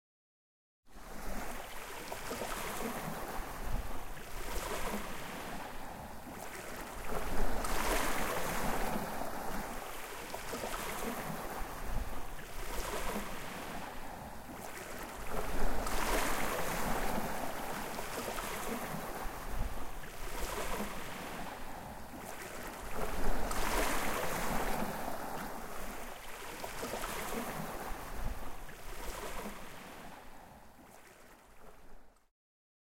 seashore waves
loop of sea-waves recorded with tascam dr-05
beach, field-recording, sea, shore, water, waves